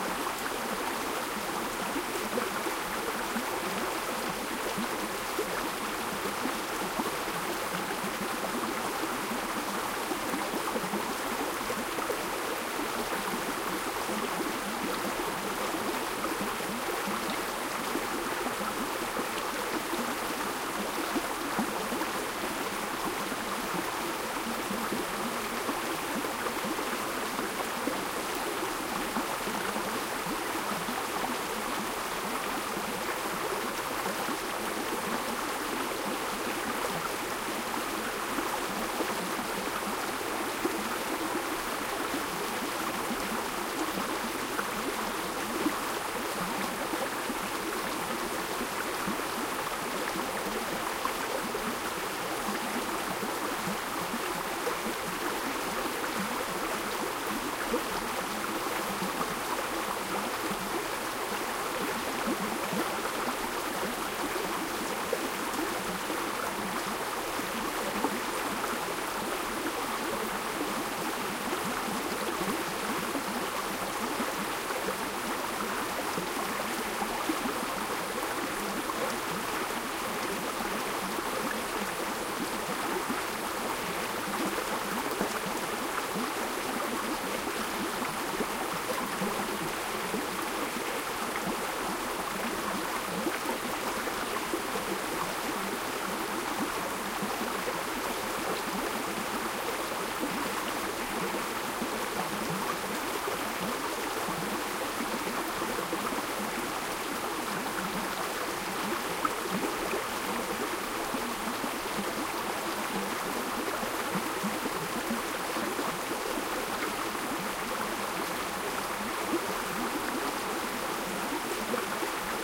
Bubbling Stream Tasmania 2 2013
Recording of stream sounds using an Edirol R09HR with Sound Professionals Binaural mics positioned on trees to create a stereo baffle.